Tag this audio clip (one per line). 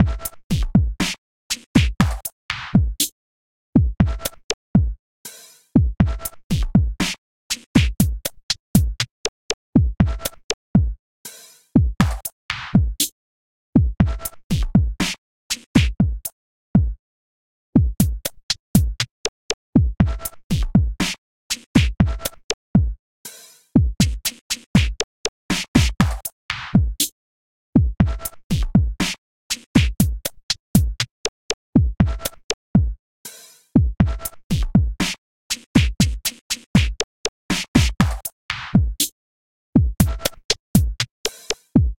atmos
ambient
Loop
soundtrack
ambience
cool
atmosphere
soundscape